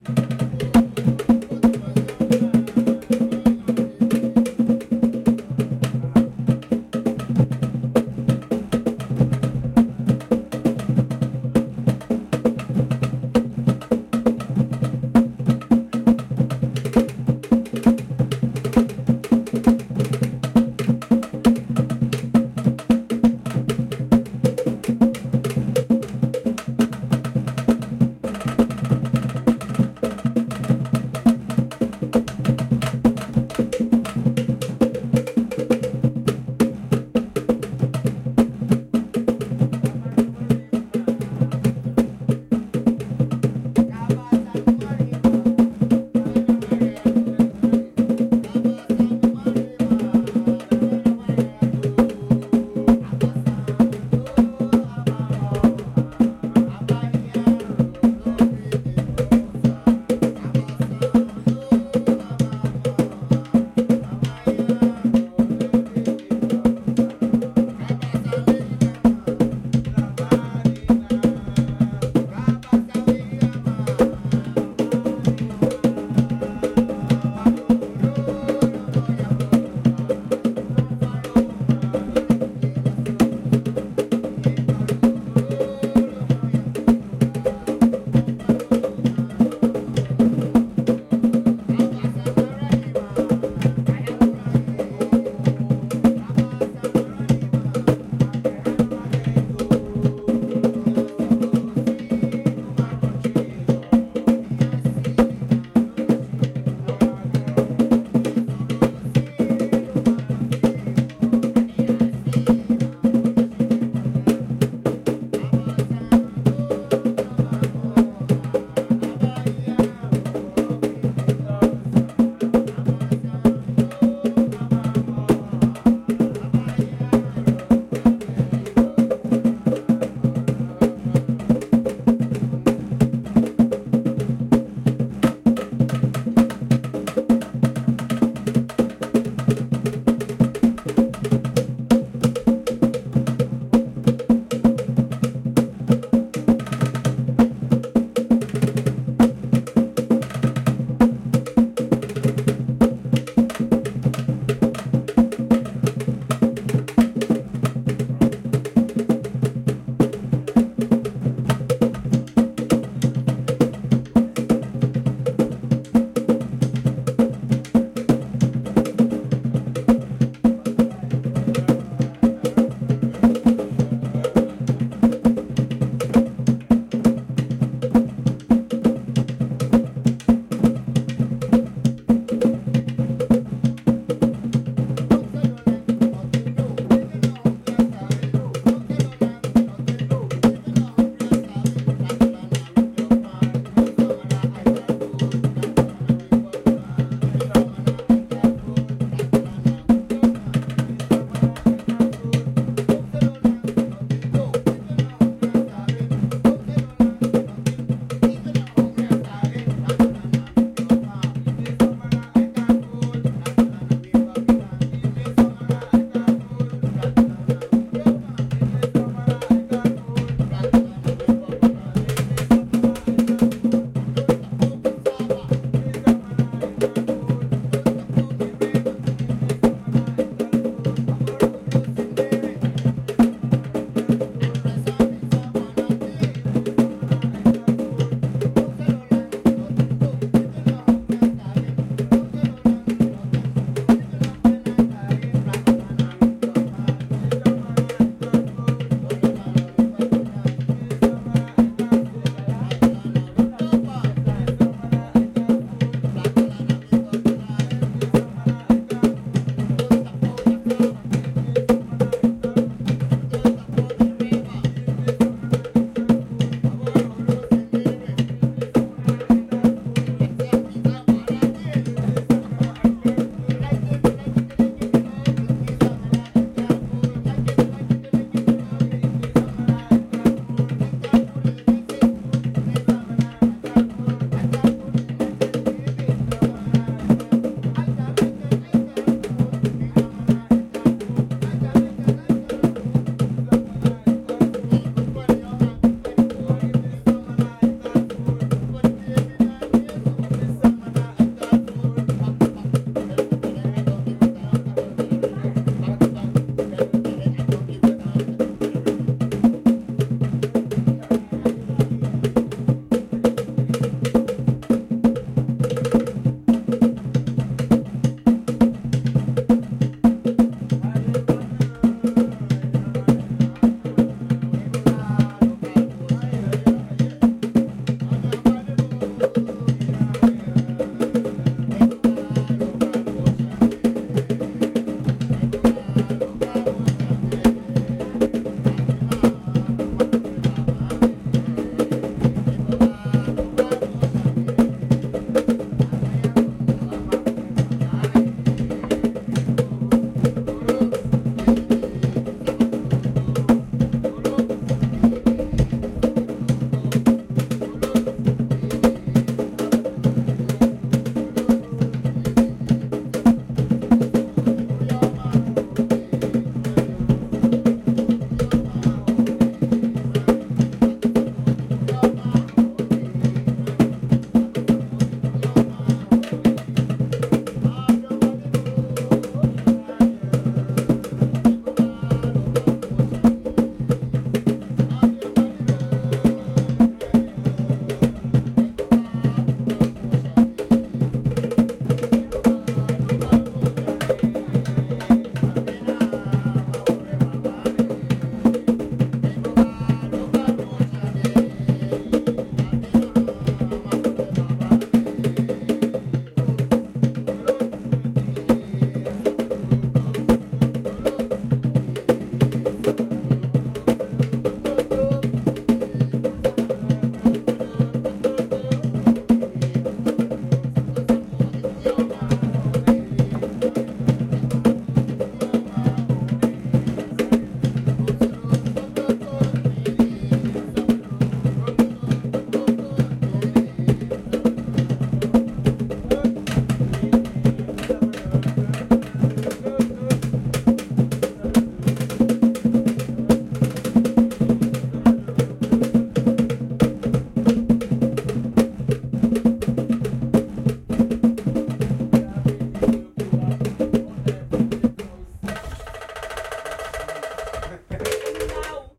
Garifuna Drummers 3
Garifuna Drumming 3, Placencia, Belize
hungu-hungu, Chumba, field-recording, Punta, African, Paranda, Cross-rhythm, Segunda, sisera, loop, Caribbean, drumming, beat, indigenous, tribal, conga, primero, drum, polyrhythm, rhythm, trance, music, Belize, djembe, Garifuna, rhythmic, drums, dance, syncopation, percussion